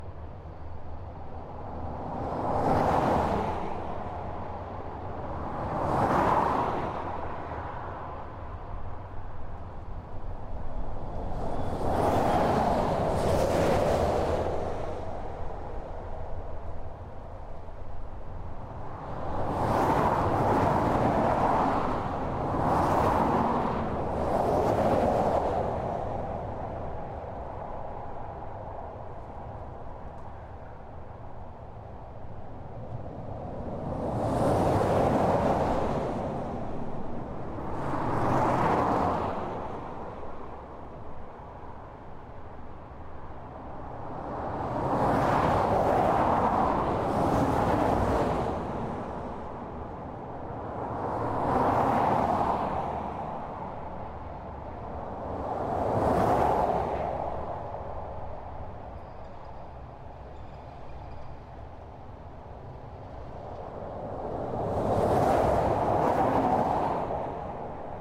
Hwy84Westbound2A
Traffic passing at 65-75 MPH. There is a mixture of passenger vehicles and commercial.
Traffic, Fast, Speed, Highway